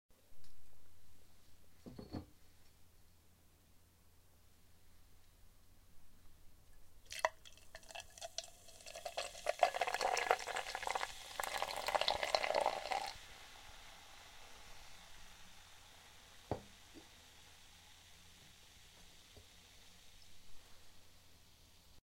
Pouring beer from a glass bottle in to a drinking glass